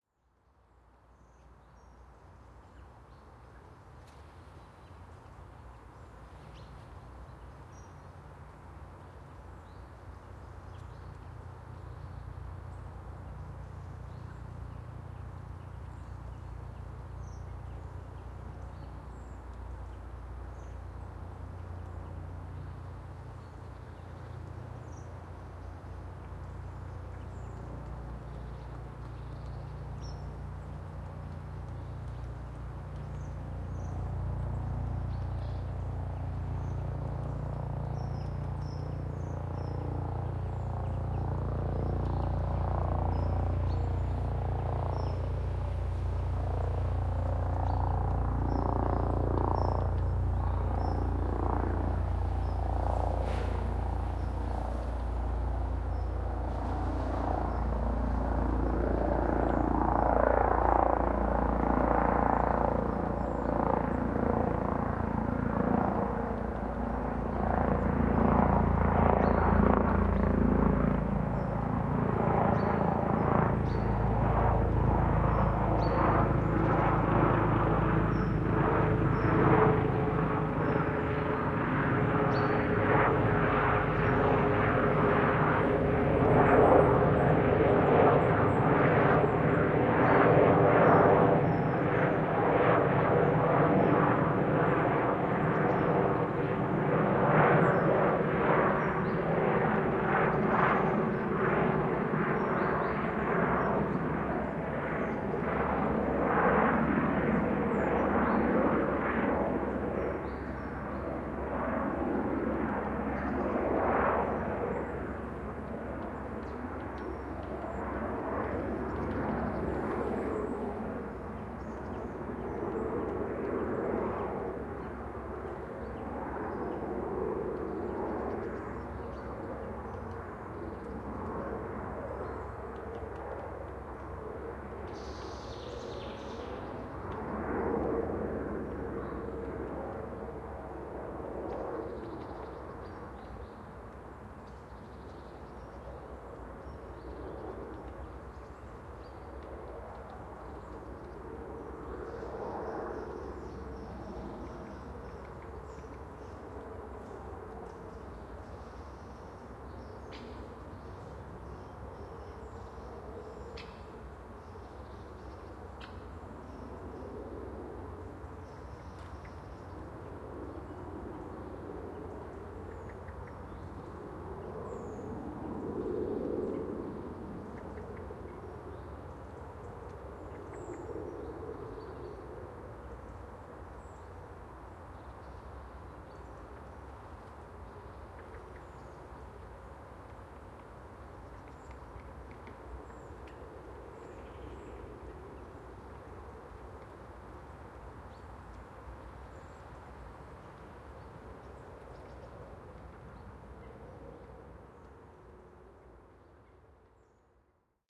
Airplane above forest
An airplane passing by above a small forest near a town.
Sound Devices MixPre-3 and Rode NT5s.
ambient, noise, aircraft, ambience, aeroplane, field-recording, birds, wind, plane, jet, forest, airplane, nature, drone, rise